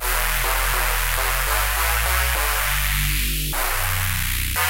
biggish saw synth e e g b 102 bpm-09
biggish saw synth e e g b 102 bpm
electronic, wave, dance, techno, rave, bass, electro, saw, acid, house, dub-step, trance, club, loop, synth